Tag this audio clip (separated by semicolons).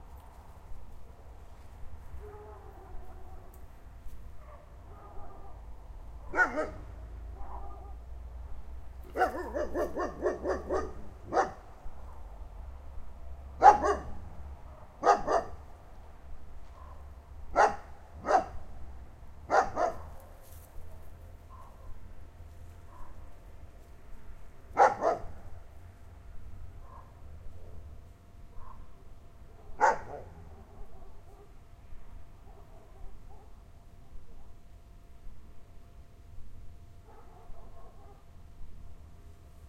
dogs; barking; night